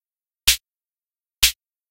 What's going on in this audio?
Percussive sample + LFO = this, I guess.
Might make a good (accompaniment for a) snare or clap.